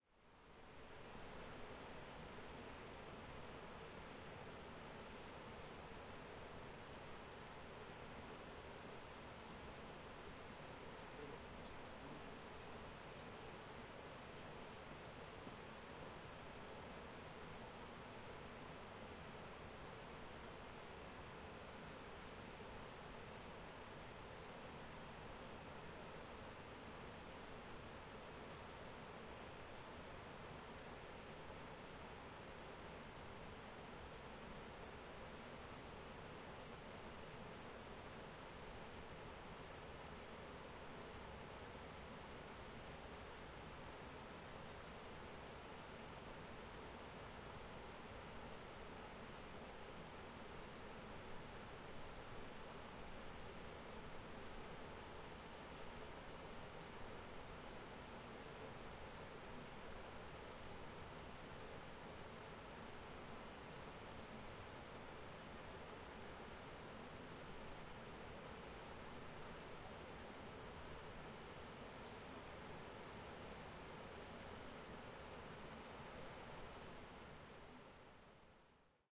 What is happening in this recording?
The sounds of Río Guadalevín in Ronda (Málaga, Spain). Recorded early in the morning with a Zoom H4N.
El sonido del Río Guadalevín en Ronda (Málaga, España). Grabado temprano por la mañana con una Zoom H4N.